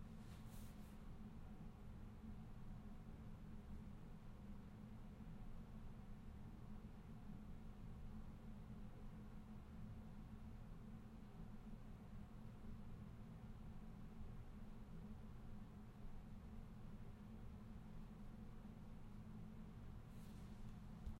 Room Noise 4 Low AC tone
Part of a collection of sounds I recorded at an elementary school after the students have finished the year--the building was largely empty and as I've worked here, I've noticed a range of interesting sounds that I thought would be useful for folks working with video games or audio dramas!
noise, Hum, Indoor, Empty, background, Ambience, Room, air, Ambiance, Tone, Buzz, Vacant, School, conditioner